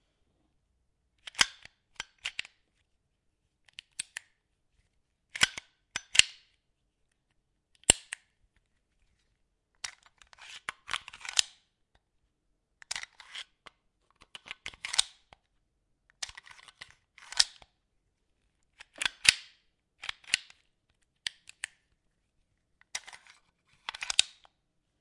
Revolver reloading 1(gun, pistol, weapon, metal)
Reloading and handling a revolver.